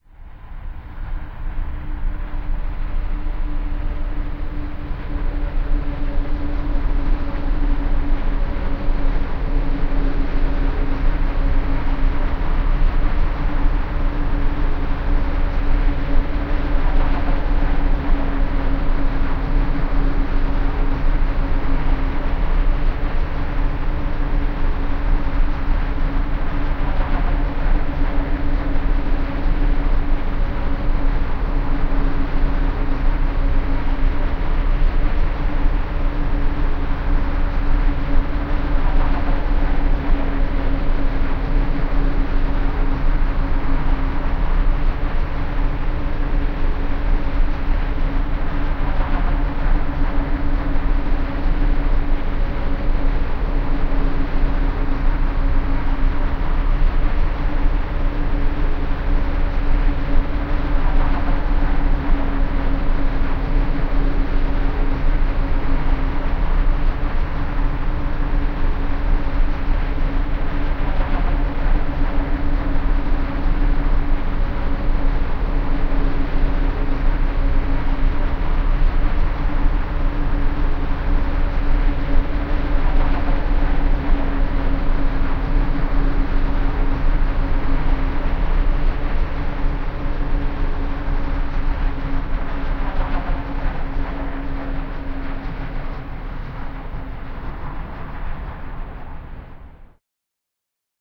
Space Hulk Reactor Tunnel
Another space background ambience. Further into the abandoned space hulk we come to a service tunnel by the reactor which powers the craft. We can hear the reactor is still working well. This sound was constructed using samples of freight trains slowed down and otherwise processed in Reaper using its built-in effects.
Ambience, Ambient, Atmosphere, Dark, Power, Sci-Fi, SciFi, Space